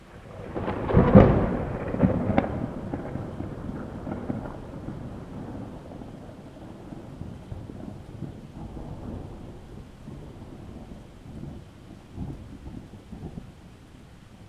SE Thunder 02
thunder, weather